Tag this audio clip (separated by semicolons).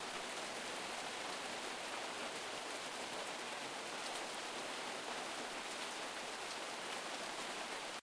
outdoors; shower; nature; field-recording; rainfall; weather; rain; sprinkle; muffled; unclear; rumble; short